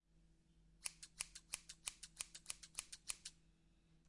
Scissors cutting hair